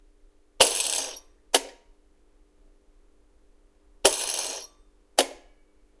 Rotating metal ashtray.